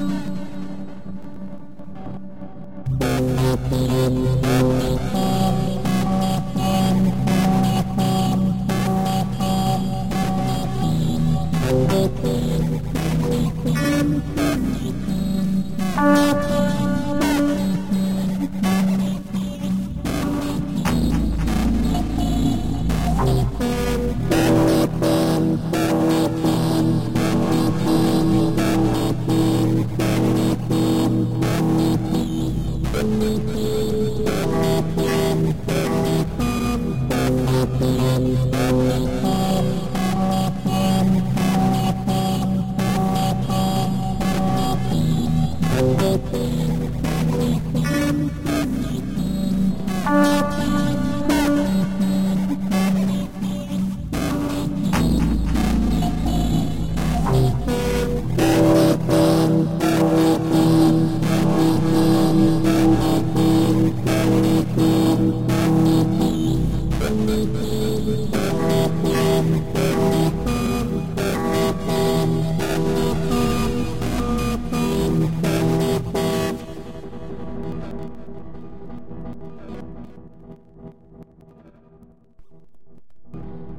Script Node I.a
Self-contained node pulled from an unfinished script; programmatically generated in late 2012.
glitch, minimal-dub, scripted